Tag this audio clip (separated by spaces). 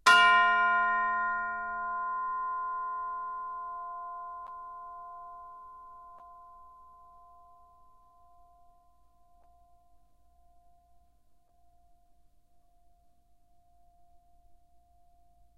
bells chimes decca-tree music orchestra sample